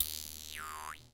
jewharp recorded using MC-907 microphone